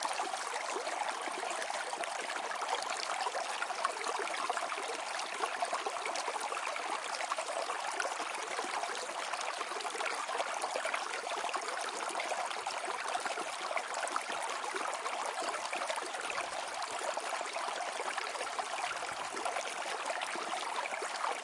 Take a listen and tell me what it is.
water streams recordings